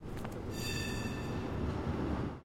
Noise of trams in the city.